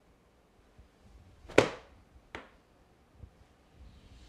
A hat being thrown the floor
floor, hat, impact